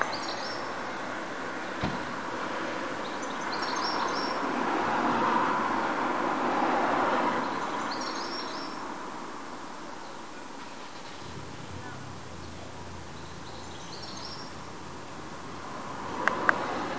A goldfinch twittering.